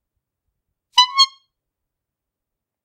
A simple horn sound I recorded with a Tascam DR-40 and a Rode NTG1 . Check the link below to see the film I used it in. Thanks!